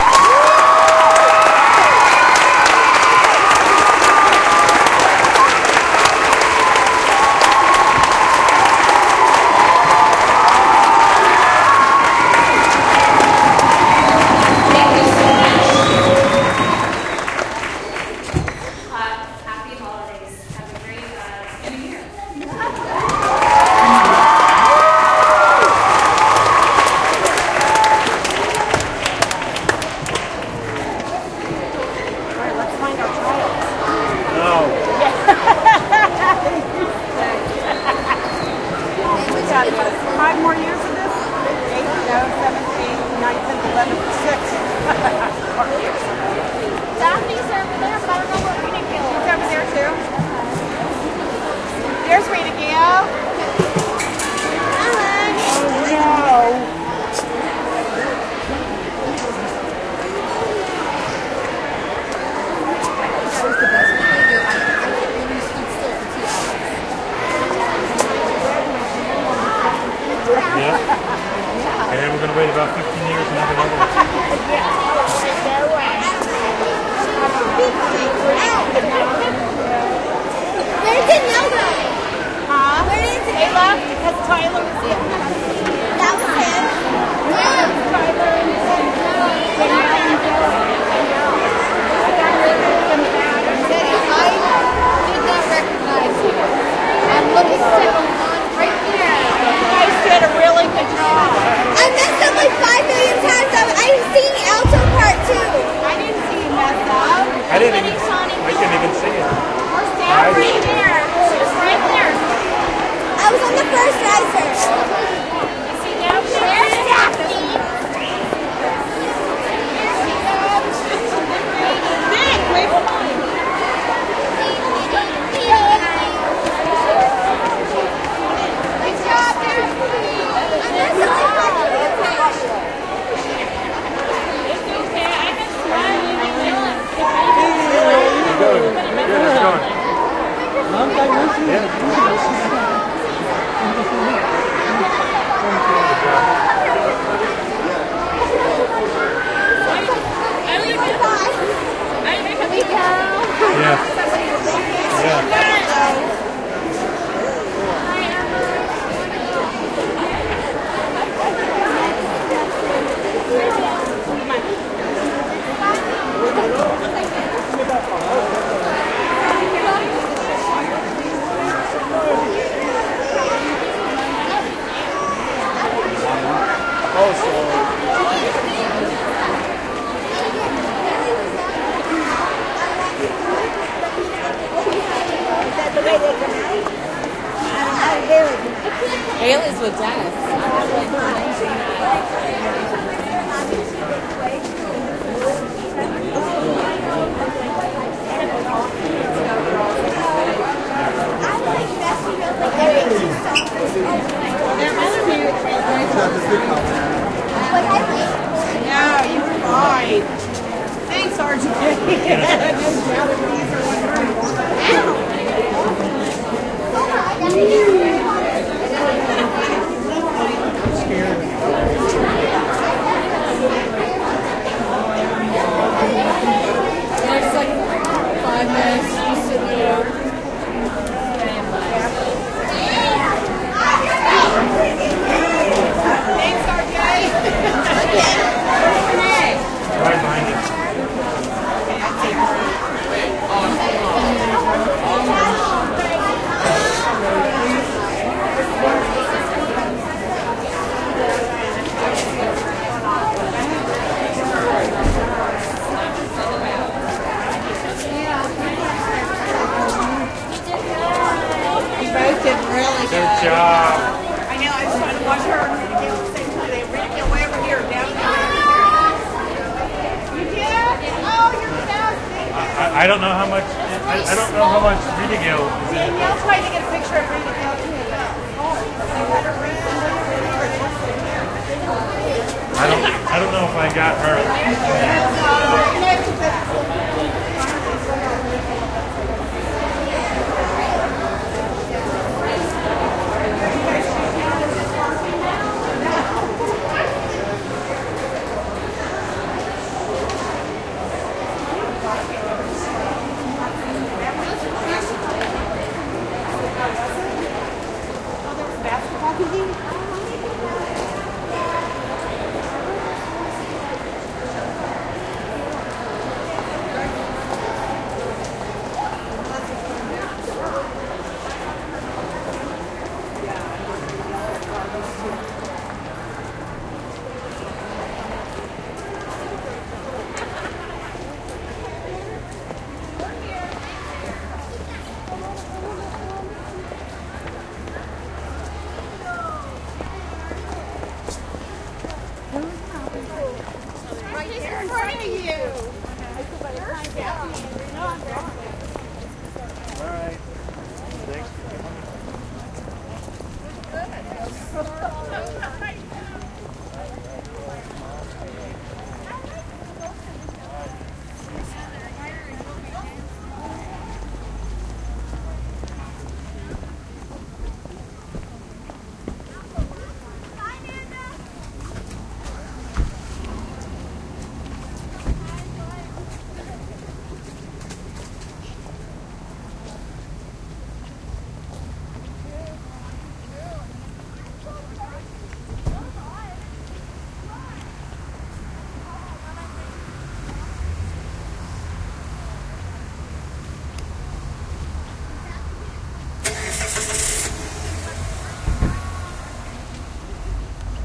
raw recital applause loudest
Raw unedited sounds of the crowd in a auditorium during a Christmas recital recorded with DS-40. You can edit them and clean them up as needed.
applause, audience, auditorium, crowd